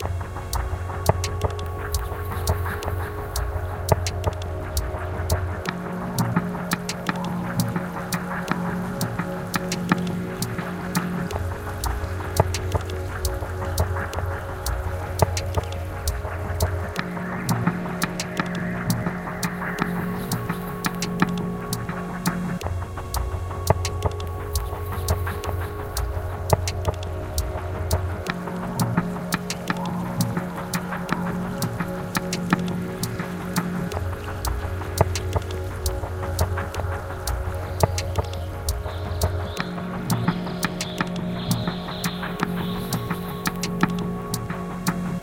CHILLOUT LOOP
Low fi beat with drone + birds & waterstream fx
stream, chillwave, calm, soft, chillout, birds, beat, spacey, waterfall, atmospheric